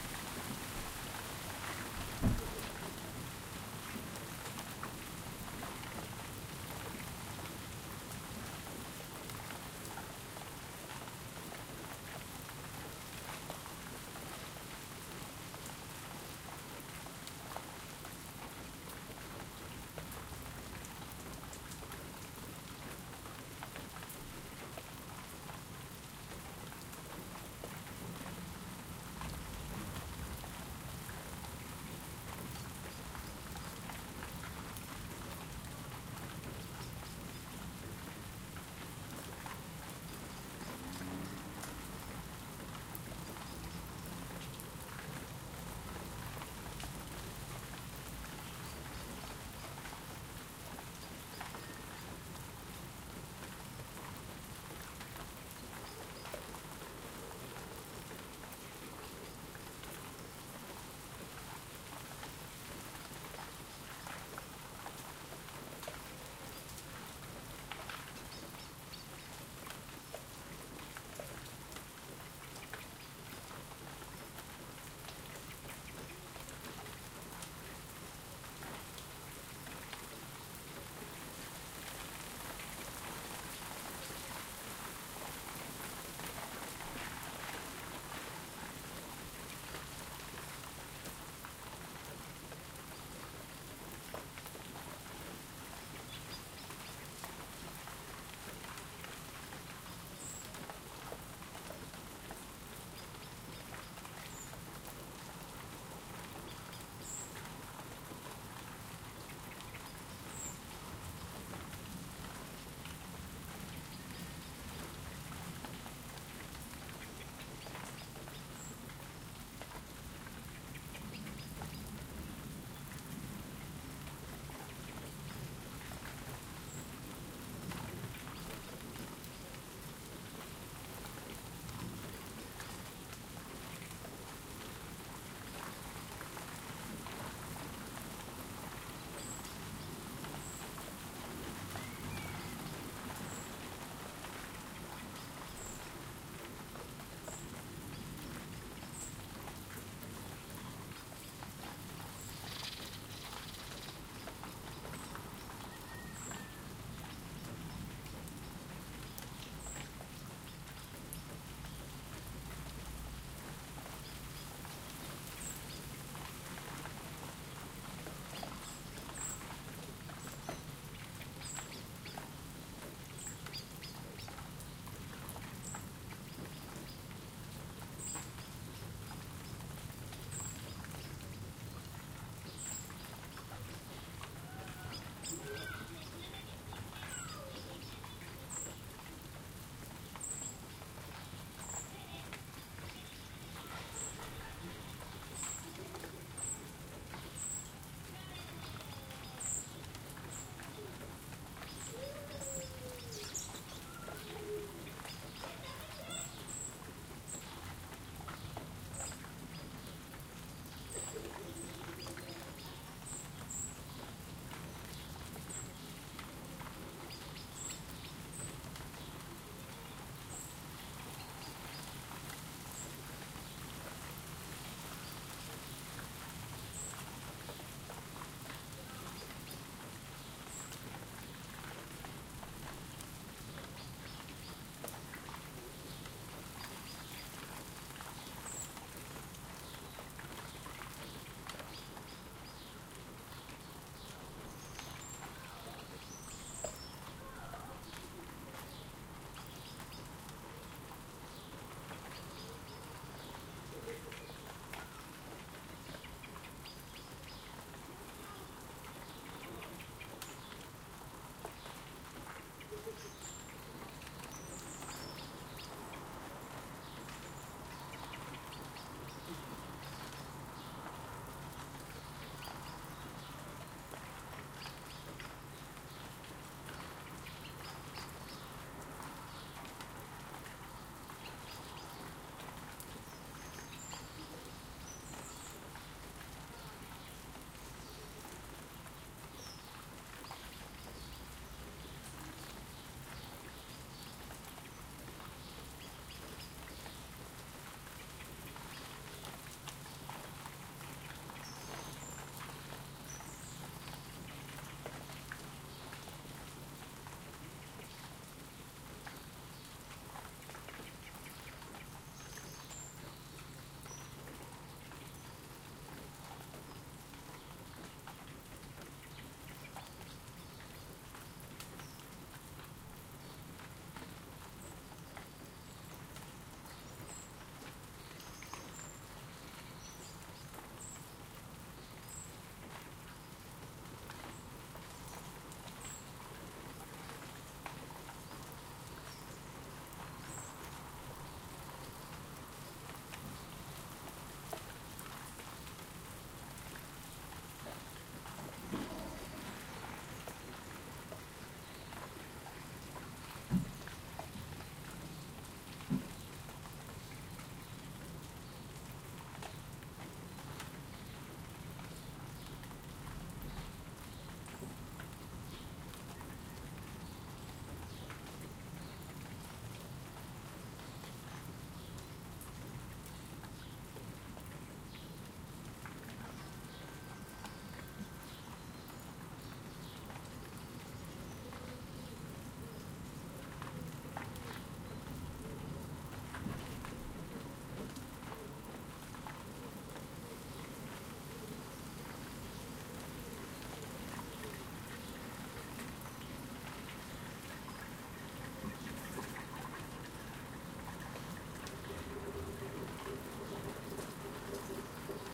A few minutes of heavyish rain which gently stops and the birds begin to sing again. Children in a neighbouring garden play and the wind is audible in the trees throughout.